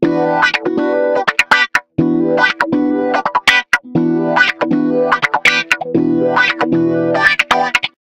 Wah-wah rhythmic riff on stratocaster guitar. Recorded using Line6 Pod XT Live.